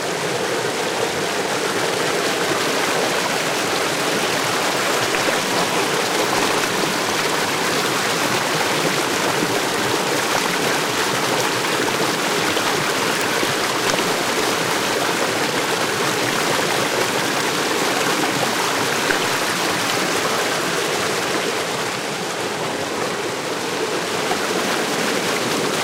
Recorded at Eagle Creek trail on Tascam HDP2 using a Sterling Audio ST31 microphone.